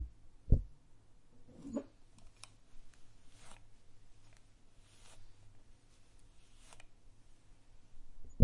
Hair being brushed
This is the sound of me brushing my hair.